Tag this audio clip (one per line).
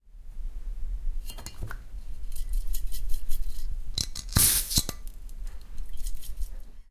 aerosol
pshh
spray